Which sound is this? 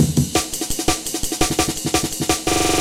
170 amen mangled 2
A mangled Amen breakbeat